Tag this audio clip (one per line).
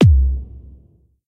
drum
kick
bass-drum
bassdrum